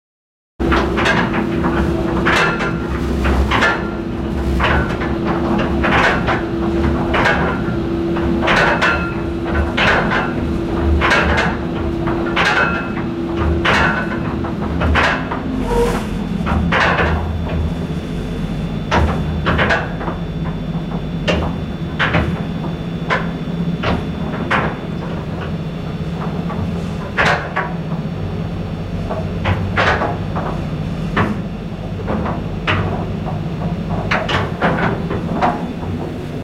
anchor raising
Clanging of ship chain as anchor is raised. Recorded outside ships engine room.
anchor, boat, chain, engine, raising, room, ship